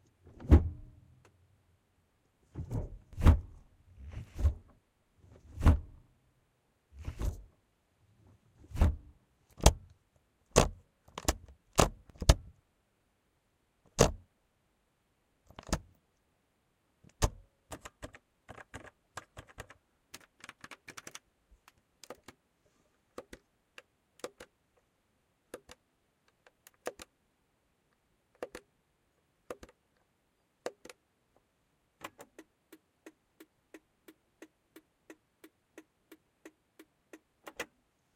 Gear shifts and other noises - Toyota Verso Interior
Recording of gear shifting in a stationery Toyota Verso (manual transmission)
Also some of the buttons on the console, turn-signal/indicator and hazard/emergency lights.
Recorded with the internal mics on a Tascam DR-07 and very slightly cleaned up in iZotope RX.
Button, Click, Emergency, Gear, Hazard, Indicator, Interior, Shift, Signal, turn, Vehicle